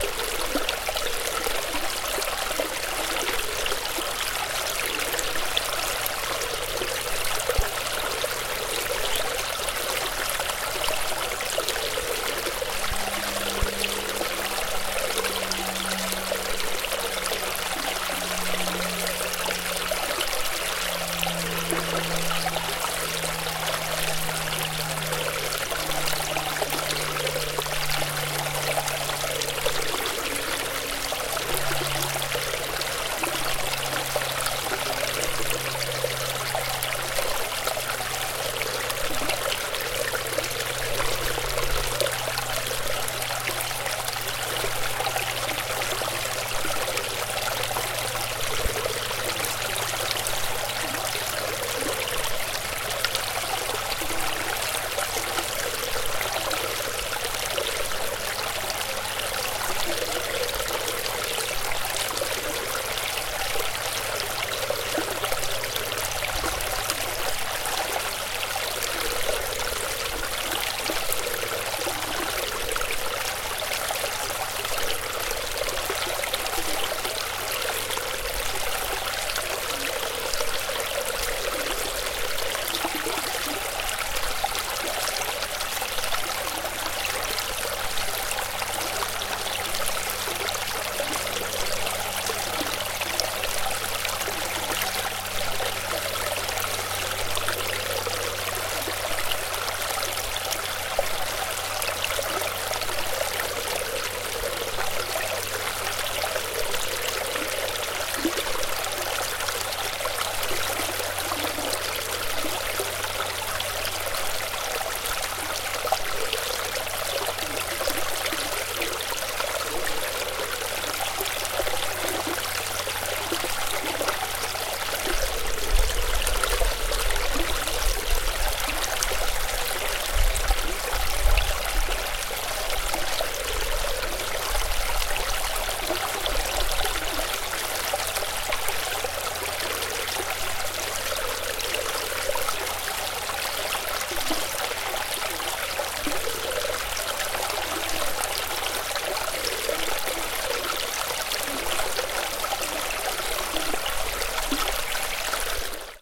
Close micing of a stream at Montana de Oro state park in California, USA. There's some airplane noise in this one.
bubble, hike, natural